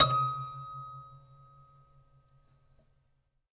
Michelsonne 87 Eb5 f
multisample pack of a collection piano toy from the 50's (MICHELSONNE)
collection, michelsonne, piano, toy